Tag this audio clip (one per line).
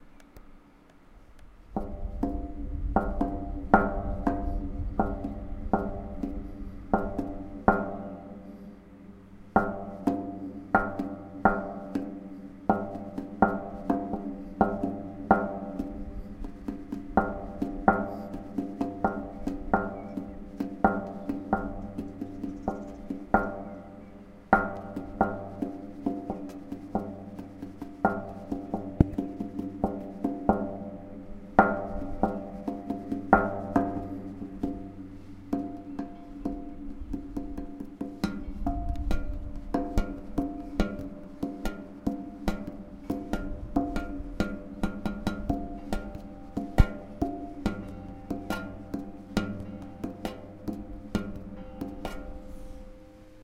kick
metal